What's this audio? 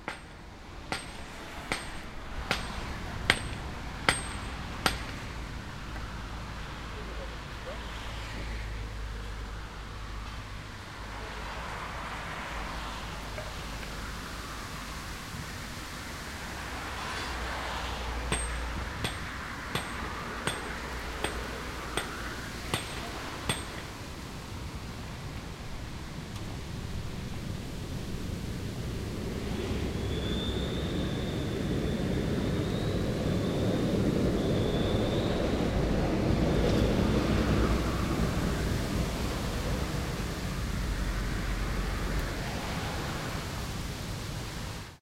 street scene 1
Two working men, passing cars in background and then tram.
field-recording, hammer, street, tram